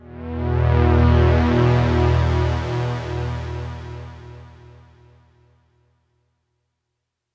Bflat-EflatPulse1
Pulse made on Roland Juno 60 Synthesizer